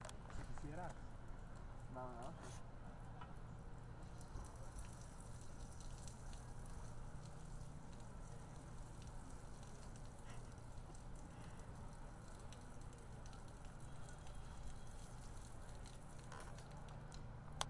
almuerzo al aire libre
ambient, background, soundscape, background-sound